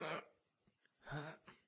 A guy straining.
human, straining, strain